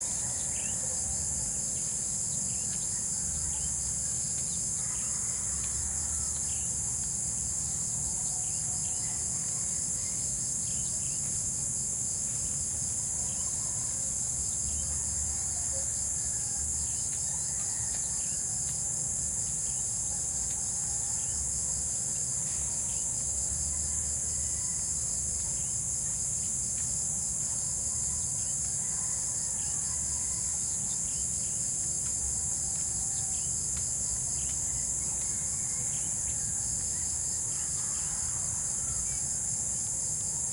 Crickets in a field of carabao grass.